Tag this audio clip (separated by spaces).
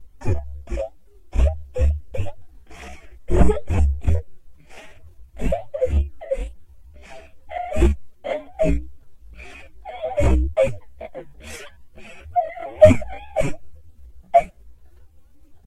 cry effects